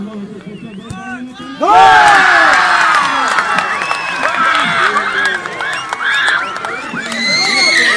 Cheering 'goal' a small group of people for a football match in Village.